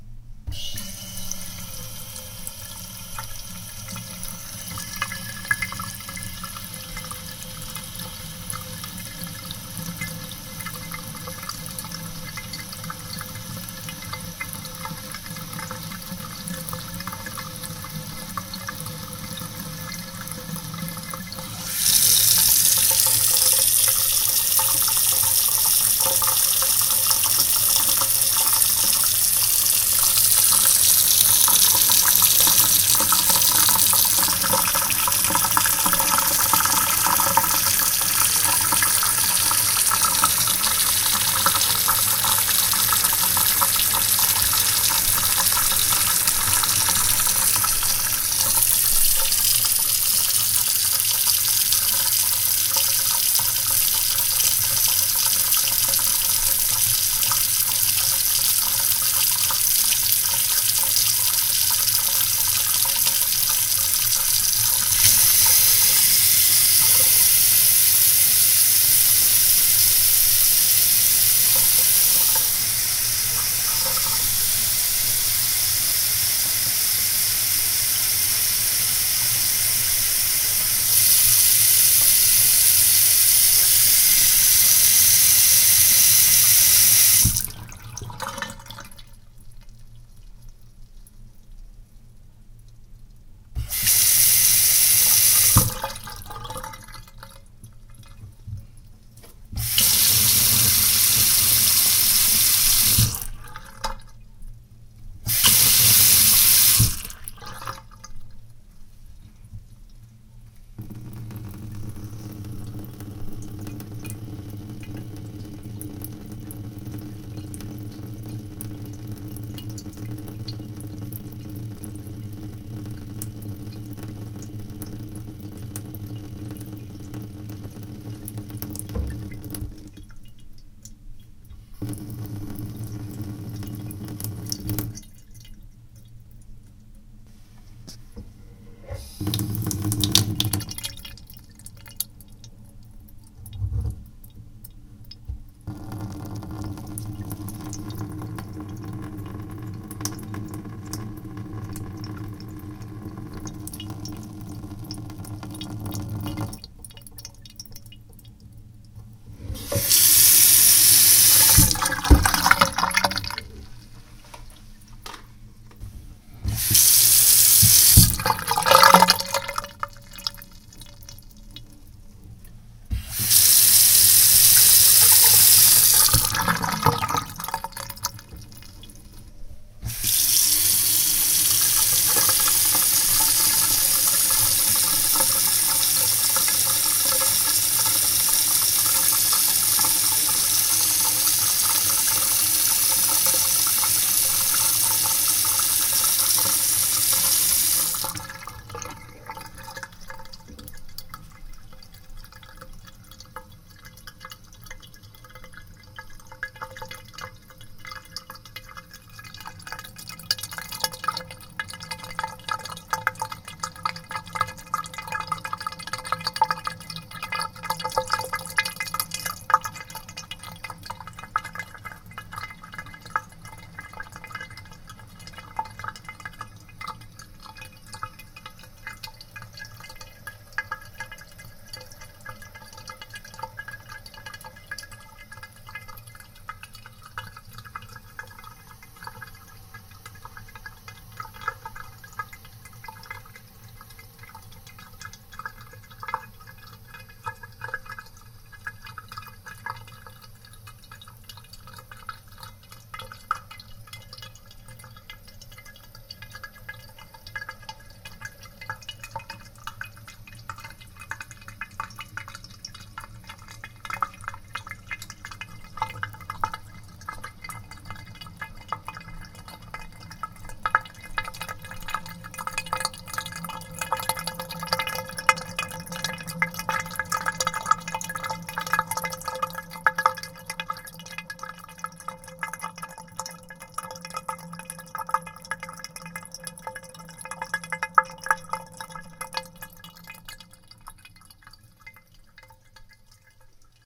Water turning on and turning off. Different strengths of stream. Nice drizzling sounds and drain sounds.